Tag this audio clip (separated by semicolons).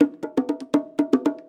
bongo; drum